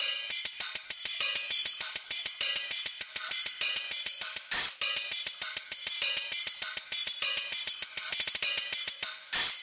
A percussion topper for EDM genres, works for Dubstep, Future Bass, Trap, etc.
Made in Reaper.